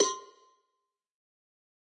Cowbell of God Tube Lower 025
cowbell, god, home, metalic, record, trash